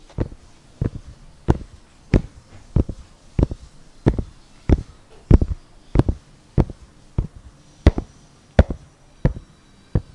shoe silulating steps.
pasos, walking, setp, footstep, walk, foot